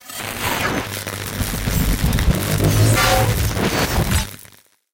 Electric Shock 8
Sounds developed in a mix of other effects, such as electric shocks, scratching metal, motors, radio and TV interference and even the famous beetle inside a glass cup.
Electronic, Mechanical, Noise, Machines